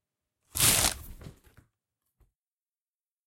S Tear Box Open
tearing open a cardboard box